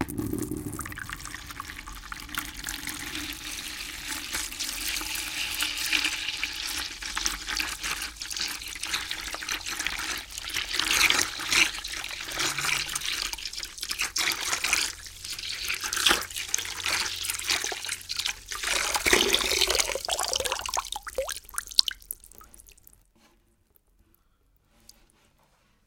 pouring some water in a bucket.
recorded with a neumann kmr 81i and a steinberg UR22.
pouring, ur22